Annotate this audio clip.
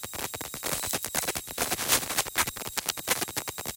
Ambience Spooky Electric Loop 01
An electric ambience sound to be used in sci-fi games, or similar futuristic sounding games. Useful for establishing a mystical spooky background atmosphere for building up suspense while the main character is exploring dangerous territory.
ambience, ambient, atmosphere, cinematic, dark, drone, electric, electronic, futuristic, game, gamedev, gamedeveloping, games, gaming, horror, indiedev, indiegamedev, loop, sci-fi, sfx, soundscape, spooky, video-game, videogames